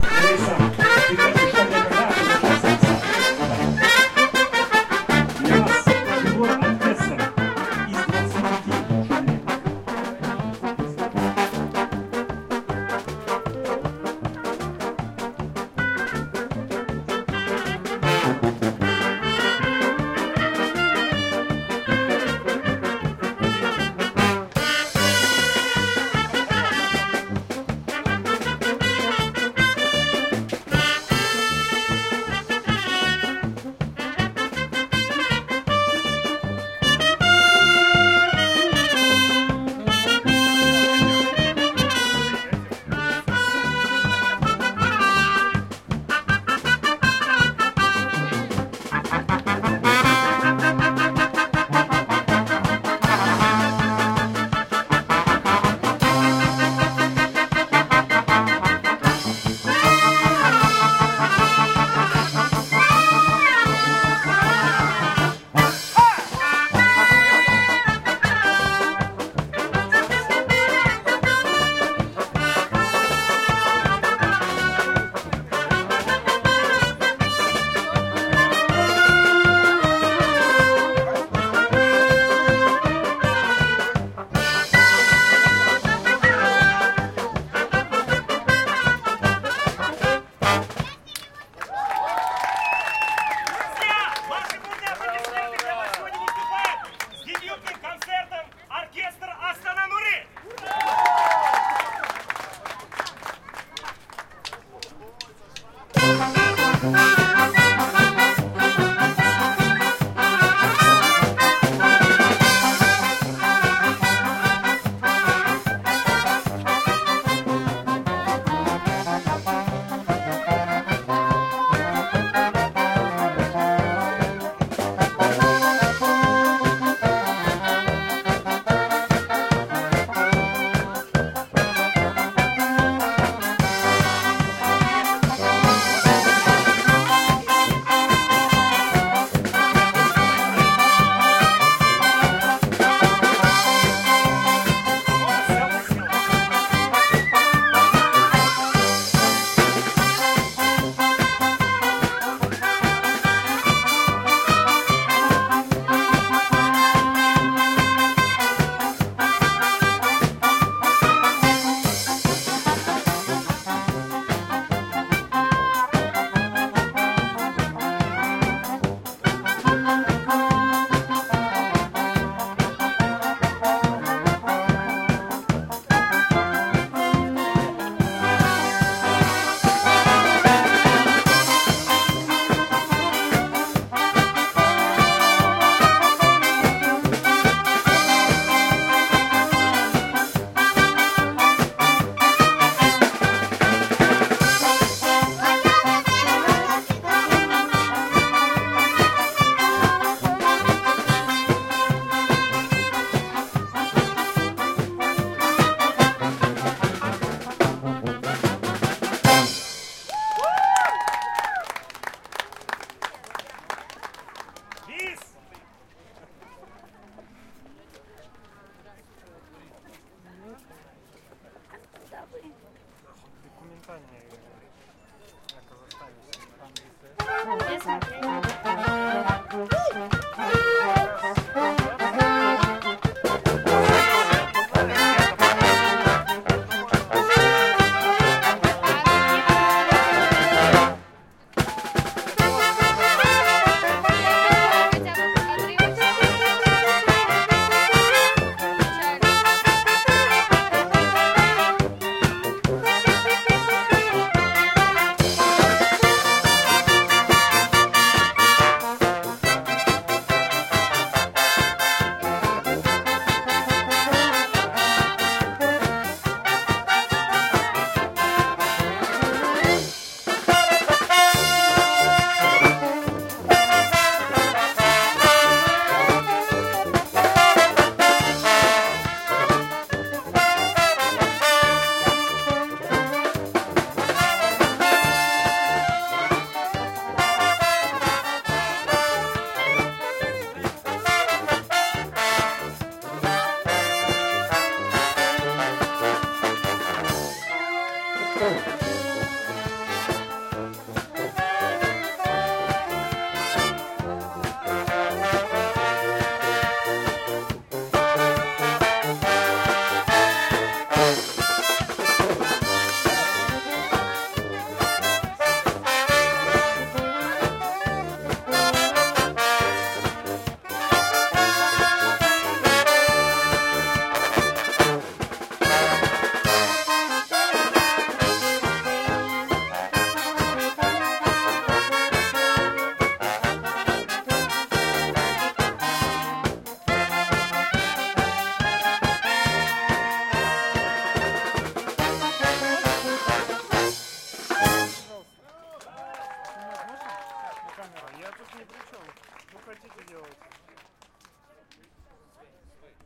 Brass band plays mixture of Russian hit songs at the riverfront of Astana, Jul 16, XY mics
Brass band plays mixture of Russian hit songs at the riverfront of Astana, Jul 16, Roland R-26's XY mics
brass-band, street-music, music, street-musician, band, brass, Astana, Kazakhstan, street, musicians, Russian, people